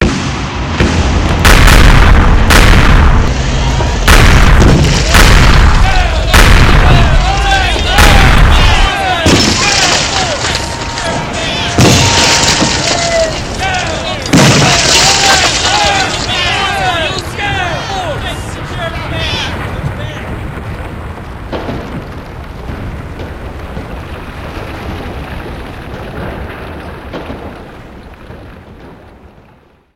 Pentecost1-Police-Crowd-Church-Explosion
I recorded this to use as a sound effect in a play called "Pentecost". The sounds I recorded were made by a college class in a theatre. I used a Crown SASS to make this recording. Here it is mixed with a sound clip from Syna-Max called War with other sounds as well to create the finished effect for the part of the play where the painting explodes. I also have just the crowd noise on here. After that the police jumed through the wall and started shooting people and I played a sound by Matt_G called m240.